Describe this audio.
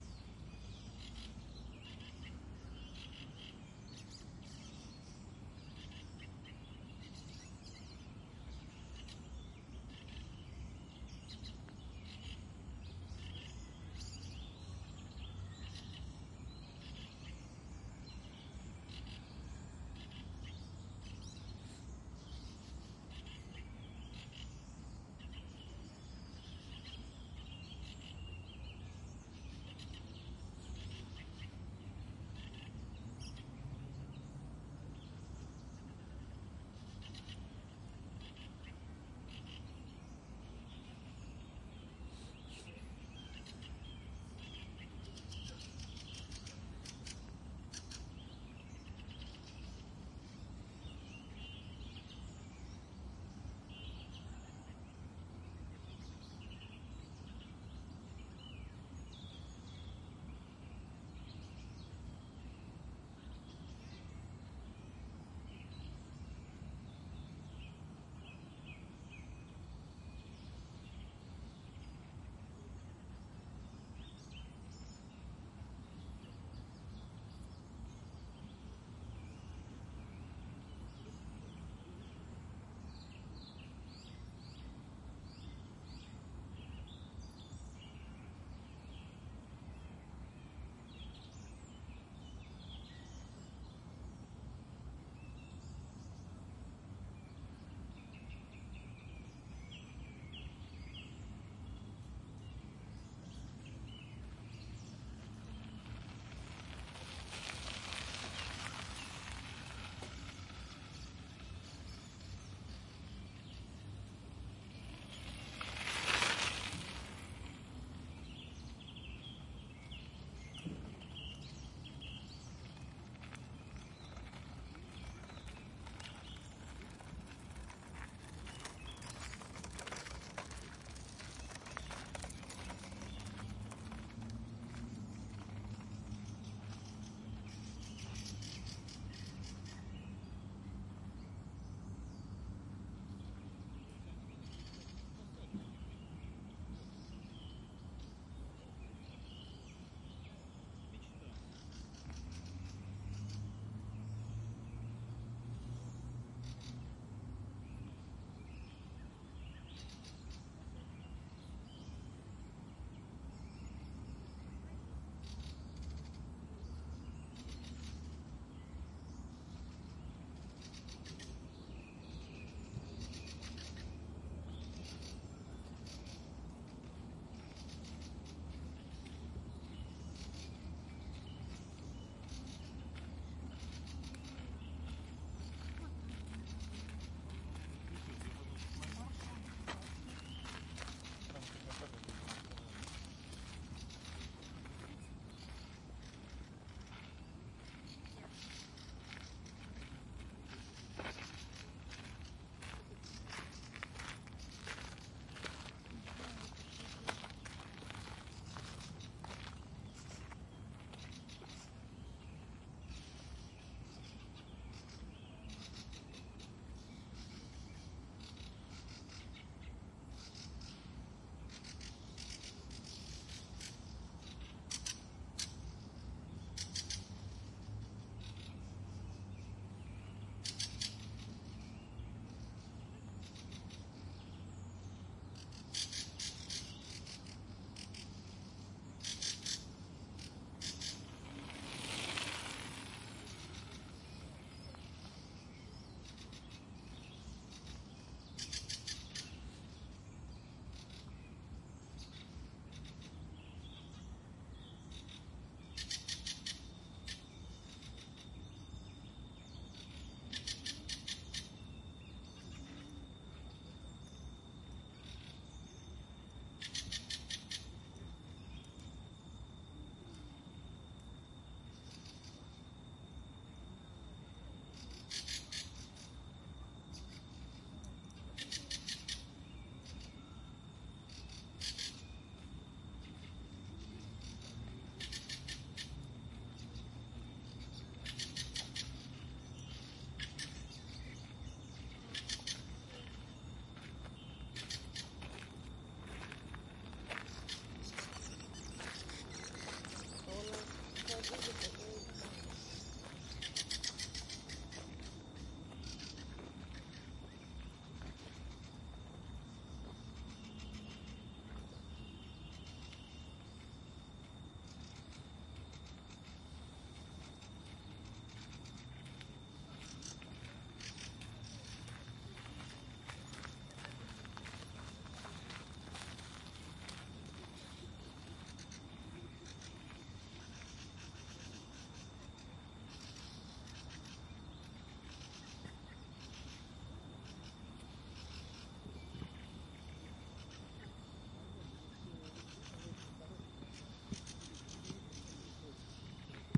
Moscow garden ambience
Lilac garden in Sokolniki Park in Moscow. people walk, bicycles pass, birds communicate, lilac blooms. May 2015.
ZOOM H2n